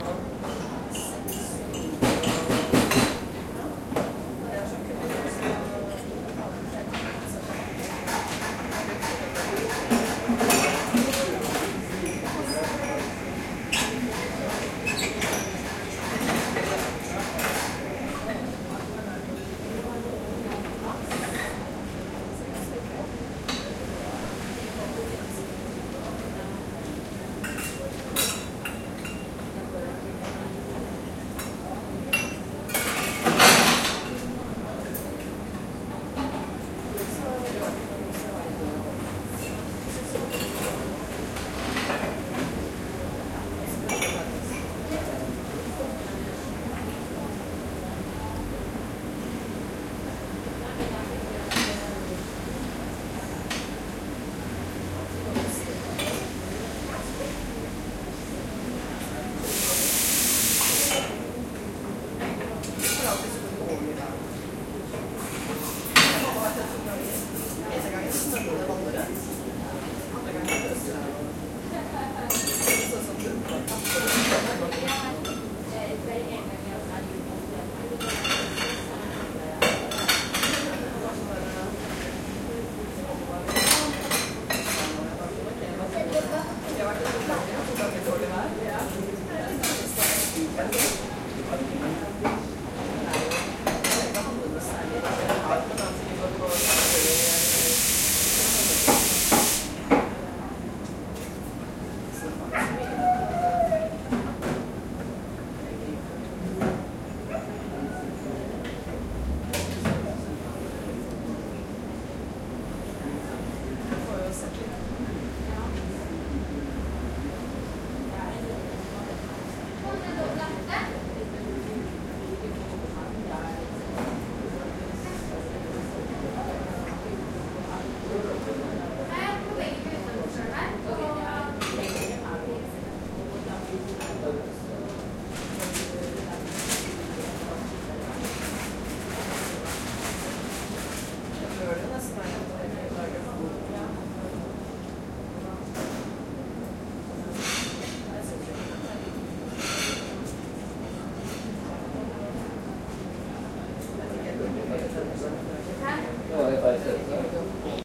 Int coffee bar 2
Stereo recording, Int coffee bar, people talking, staff working. Entrance door open, sound from traffic on wet road from outside.Location: Evita coffee bar, Grønland, Oslo, NorwayEquipment: Olympus LS-10 digital recorder, integrated microphone
bar, coffee, field, int, recording